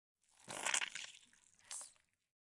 Squelching SFX [1]
Squelching sound effect of blood/stabbing/flesh/gore.
(I’m a student and would love to upgrade my audio gear, so if you like/download any of my audio then that would be greatly appreciated! No worries if not).
Looking for more audio?
flesh, gore, Squelch, tear, blood, Squelching